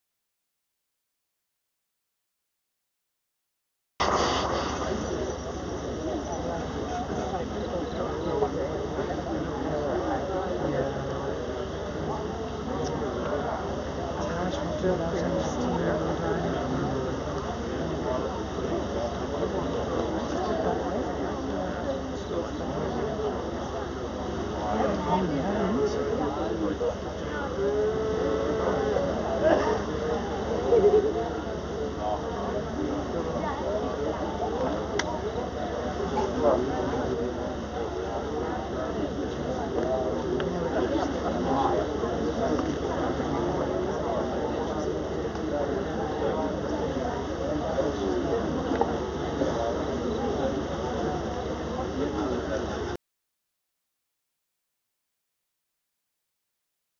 5 Theyam courtyard calm
Background sounds of villagers taking turns to meet the spirits